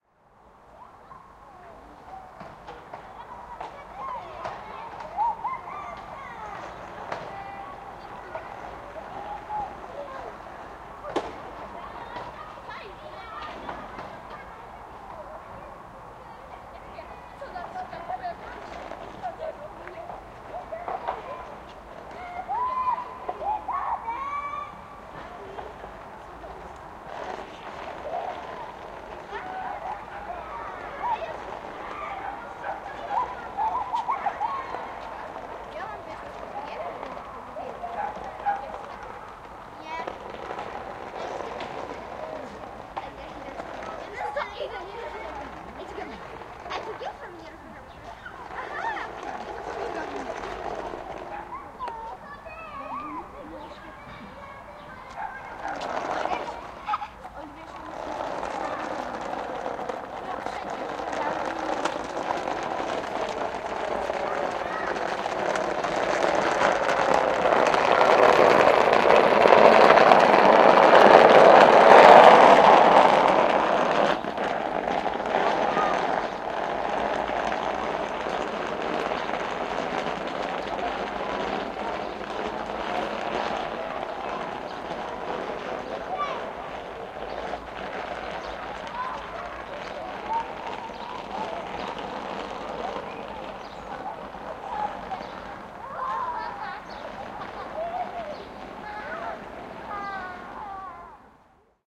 after school os.sobieskiego 06.04.2016
06.04.2016: soundwalk with my student (exercise during Ethnological Workshop: Anthropology of Sound). The Os. Sobieskiego in Poznań. Ambience of Os. Sobieskiego in front of the Snow White Preschool. Recordist: Zuzanna Pińczewska.
ambience, atmosphere, fieldrecording, Os, Pozna, Sobieskiego, soundscape, soundwalk